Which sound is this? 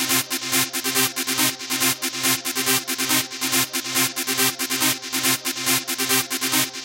trance trumpet loop
the Trumpet trance loop generated with 3 OSC and Fuzz generator, creating a trumpet like sound.Added Hall,Re verb and Equalizer.Tweaked the Sound using some equalizer settings.created with FL-Studio 6 ( this sample can be looped )
140bpm, dance, loop, synth, techno, trance, trumpet